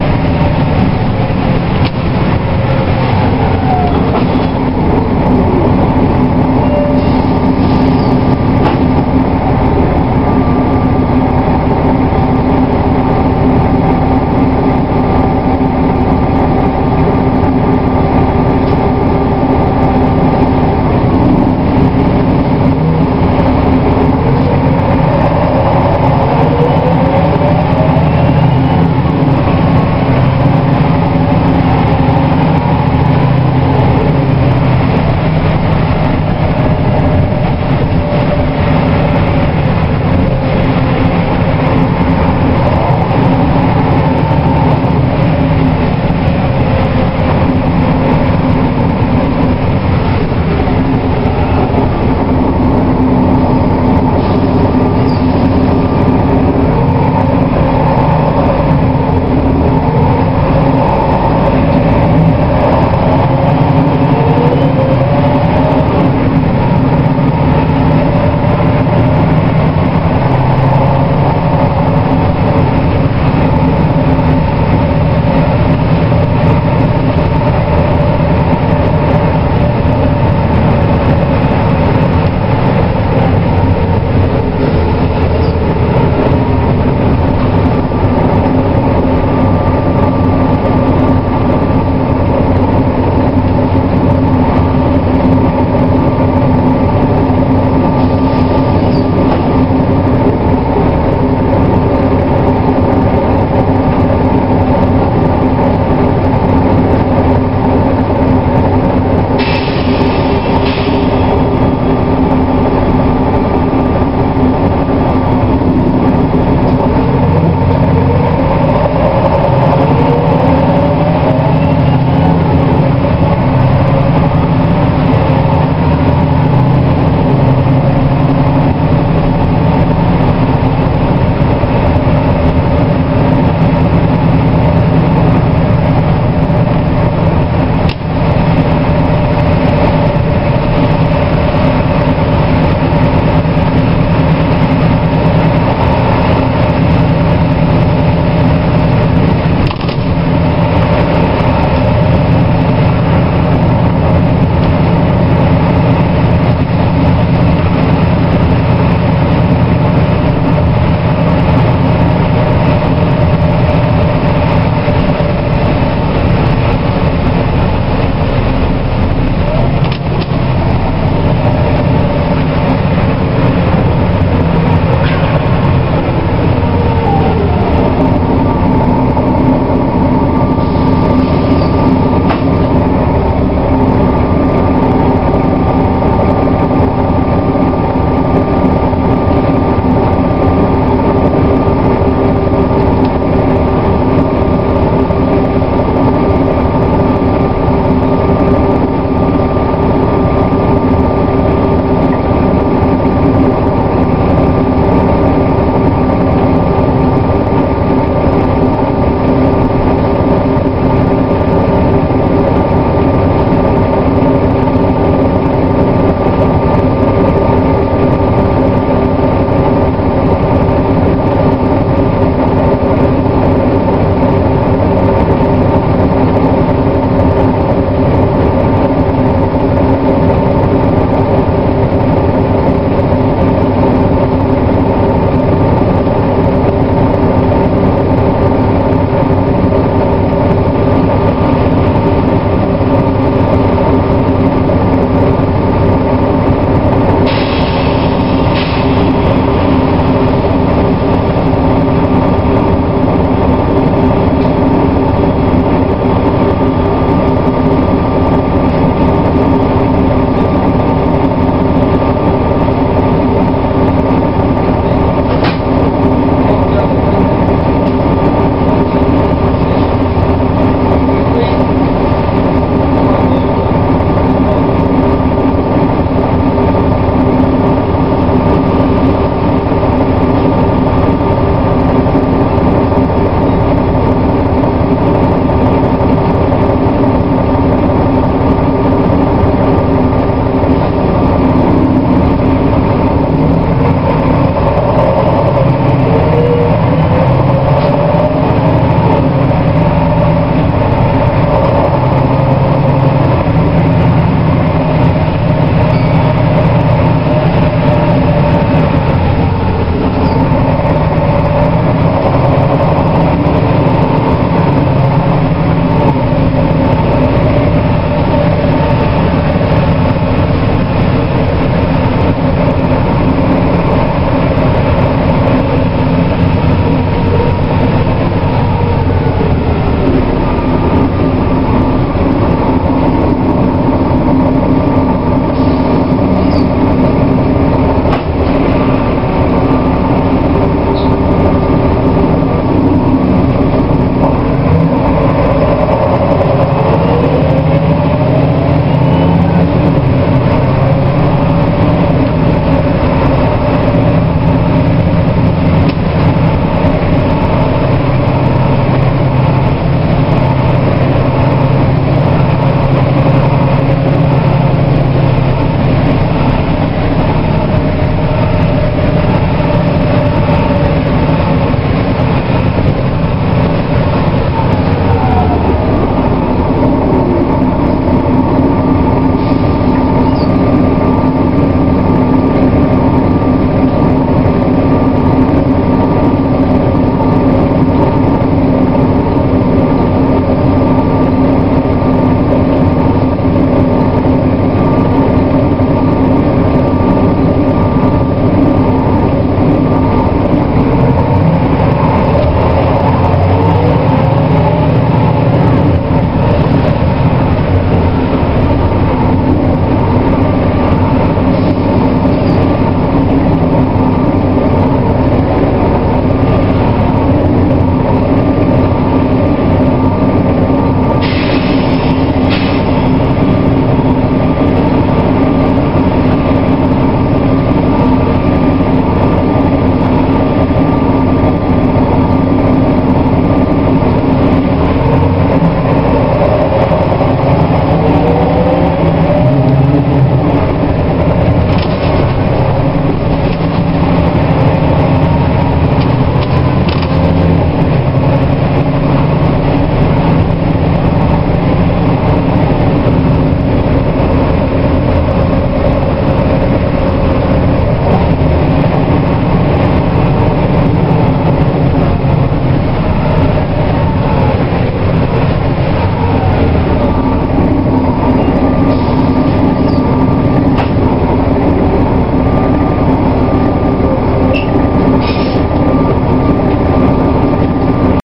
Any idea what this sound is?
The Sound Of A Bus In Motion
Captured this sound on my Canon Powershot A460 digital camera while traveling on a bus through Glasgow Scotland. The type of bus I was on was a single decker Volvo, by First Group, made by the famous Wrightbus.
:) Here Is a link to the type of licence I use.